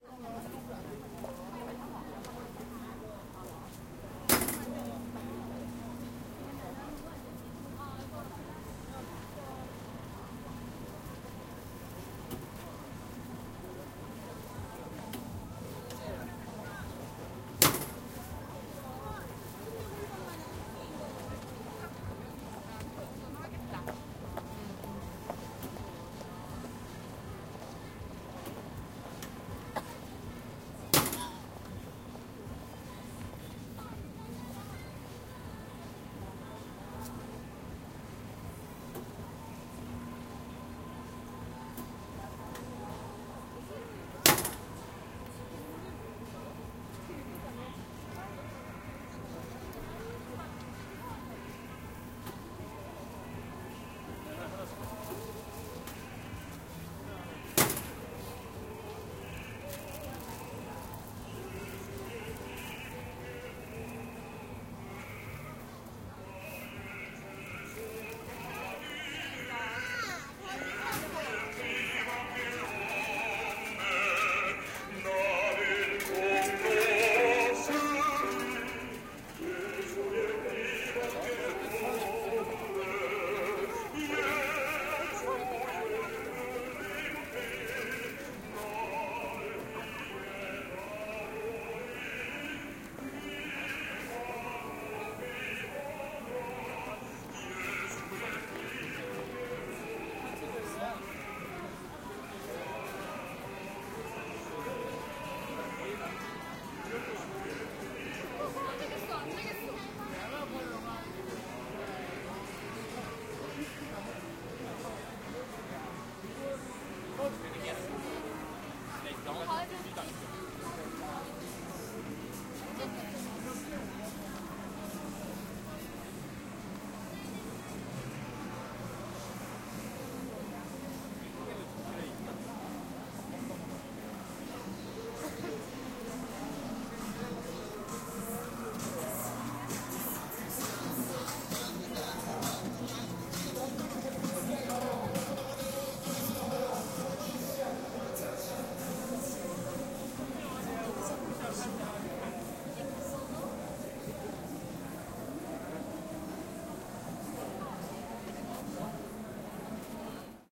Rice pie machine. Opera music. People talking. Music in the background.
20120212